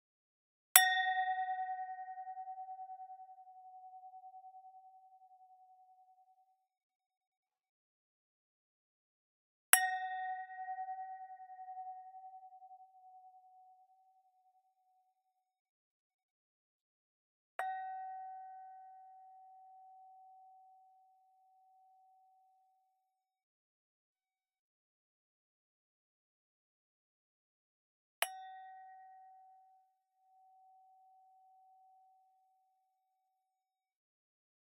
AKG C214 condenser mic, mackie ONYX preamp, AD Cirrus Logic converter, minimal amount of RX3 NR
The first two sound are two wine glasses, with a bit different tone, struck at one another. The other two sounds are a hit of a glass of whiskey on a wine glass.
champagne-glass, glass, glasses, vine-glass